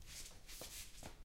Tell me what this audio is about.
This sound is part of the sound creation that has to be done in the subject Sound Creation Lab in Pompeu Fabra university. It consists on a person putting on.
comfortable, feet, foot, home, slippers, UPF-CS14